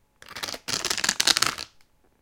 This is from a library of sounds I call "PET Sounds", after the plastic material PET that's mainly used for water bottles. This library contains various sounds/loops created by using waste plastic in an attempt to give this noxious material at least some useful purpose by acoustically "upcycling" it.
waste, ecology, plastic